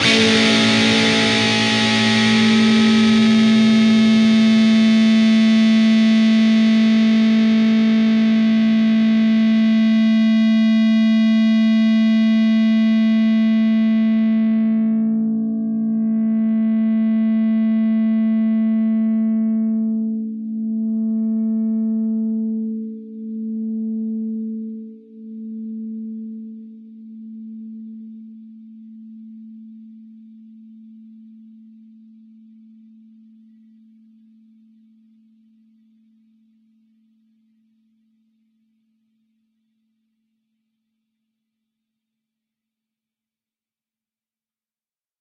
Dist Chr Amj 2strs 12th up
Fretted 12th fret on the A (5th) string and the 11th fret on the D (4th) string. Up strum.
guitar-chords, guitar, chords, distorted-guitar, distortion, distorted, lead-guitar, lead